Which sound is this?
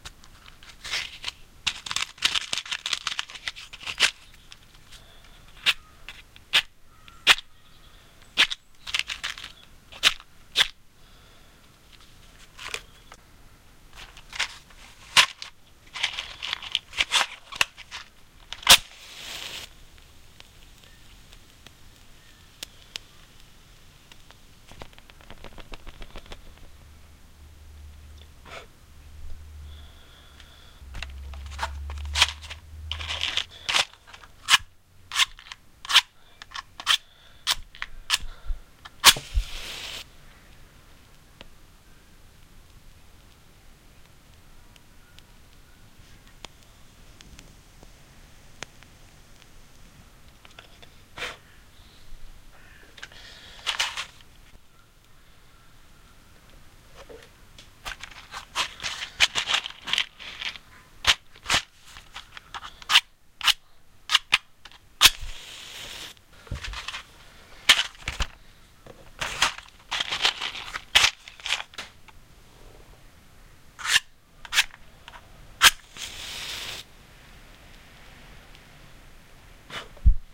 Me having several attempts at striking and lighting a match -in stereo.
Recorded onto my Sony Minidisc
match-box, fire, rattle, lit, flames, light, matches, strike